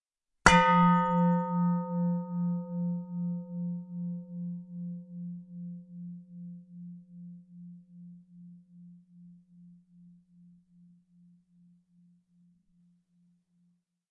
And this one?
Stainless Steel Bowl 3
A stainless steel bowl struck with a wooden striker.
bell, bowl, ding, percussion, ring, stainless-steel